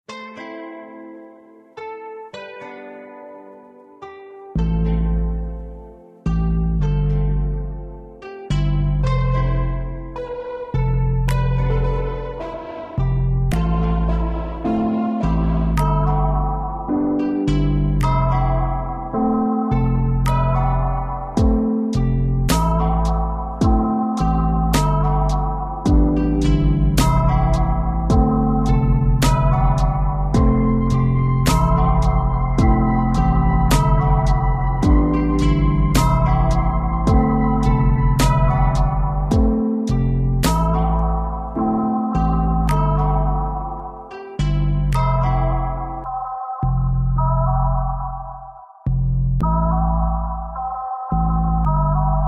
west dreaming
a kind of western slash modern loop you can use it with whatever you want to use it for. made with splash